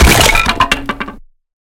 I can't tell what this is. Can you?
Wood Crate Destory 3
Layered sound of some wood
box, break, crate, destroy, impact, wood, wooden